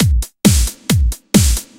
Well, I tried to make this good enough for a common use likely for any electric song or something.